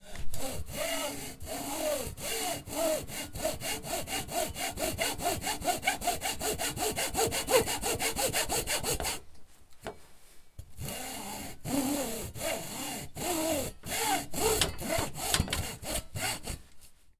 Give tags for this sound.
CZ Czech Pansk Panska wood

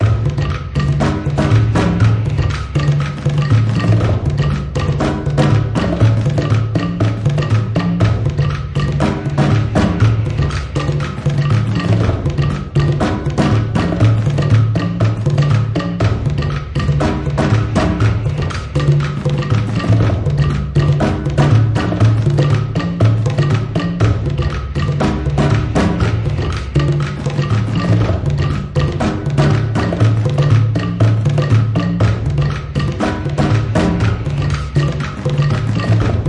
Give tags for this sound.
Ambient Bass Beat Drum Drums Ethno Fast Jungle Kick Movie Snare Sound Surround Travel Tribal

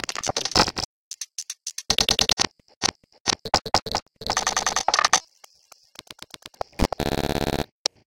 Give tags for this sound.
granular glitch noise glitches beat pops clicks beats idm pop click

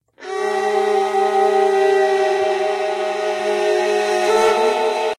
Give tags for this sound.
aliens combat creepy evil kill military panic psycho violin war

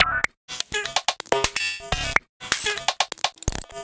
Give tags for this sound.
Loops Abstract Percussion

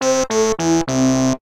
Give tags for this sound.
game-over game failure

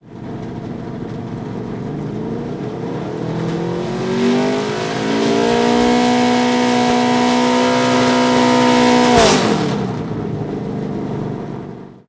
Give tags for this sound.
24 jeff

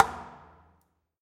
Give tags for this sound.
field-recording hit industrial plastic fx drum

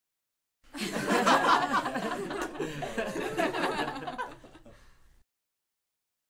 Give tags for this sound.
laugh group

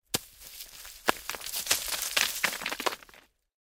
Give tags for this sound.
falling field-recording outdoor rock rocks stone stones

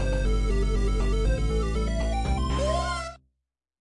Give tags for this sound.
playstation video-game sony videogame

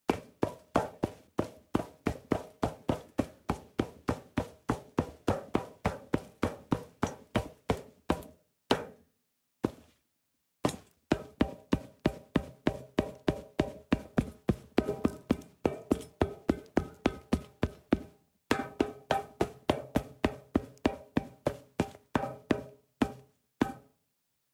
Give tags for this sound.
field-recording footsteps metal

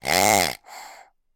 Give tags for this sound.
plastic rubber dog squark toy

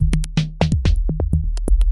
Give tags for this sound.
125-bpm drumloop electro

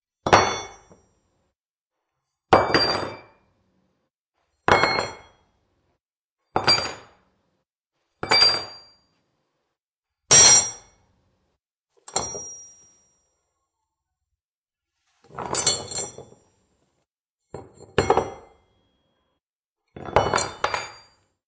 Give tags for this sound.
clank clink foley metal put-down wrench

